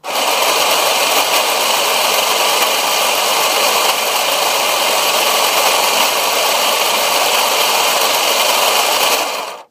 Sound of shuffle machine to shuffle cards, used for poker sessions. Please note, that it's empty in this recording, no cards loaded.
cards, motor, poker, shuffle